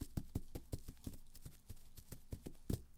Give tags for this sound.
Walking Grass Animal Steps

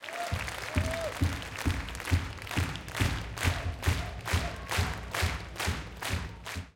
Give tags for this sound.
applaud applauding applause audience auditorium group hand-clapping Holophone theatre

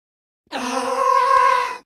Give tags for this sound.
processed scream

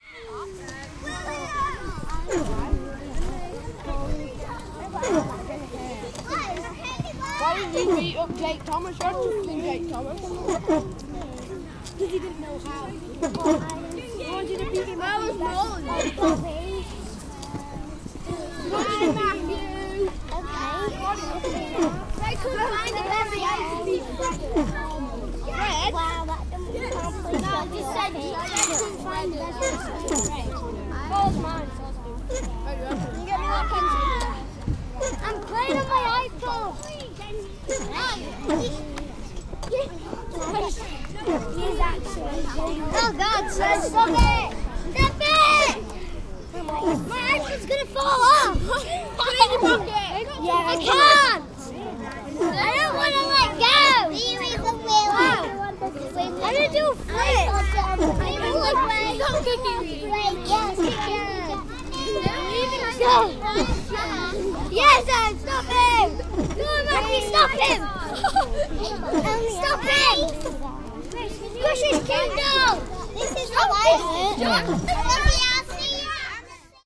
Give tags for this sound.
Children Fun Games Kids Play Playground Playing

